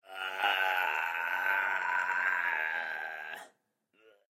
Weird Zombie Moan, yeah don't ask lol
Moan, Monster, Weird, Zombie